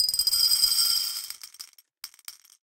Bag of marbles poured into a small Pyrex bowl. Glassy, granular sound. Close miked with Rode NT-5s in X-Y configuration. Trimmed, DC removed, and normalized to -6 dB.
bowl, glass, marbles, pour